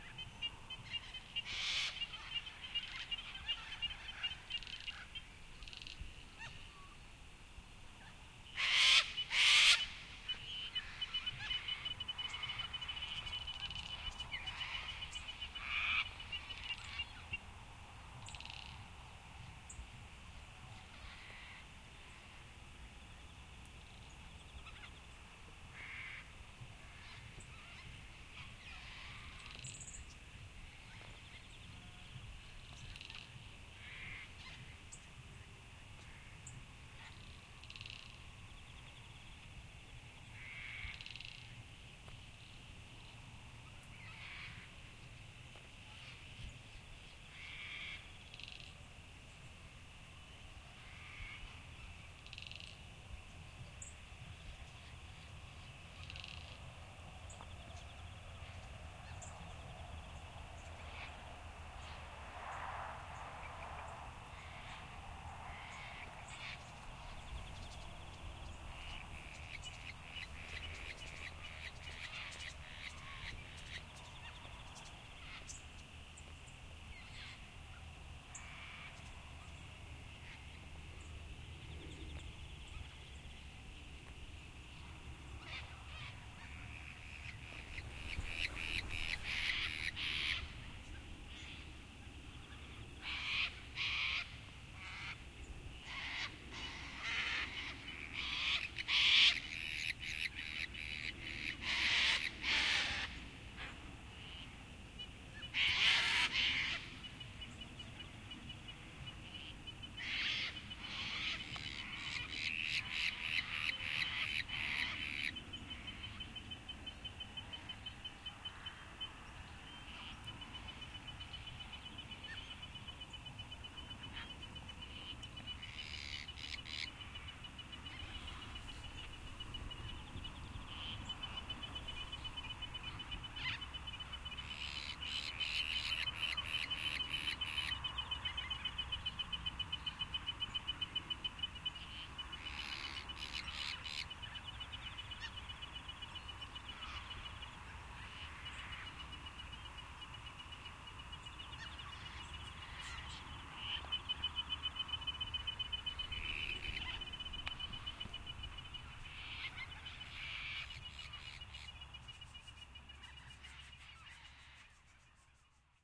Birds and Insects near Dam - Cathedral Ranges
Birds and insects near a dam on a farm in the Cathedral Ranges, Victoria, Australia.
Apologies for some slight handling noise.
Recorded on an H2N, MS and XY.
recording, insects, bush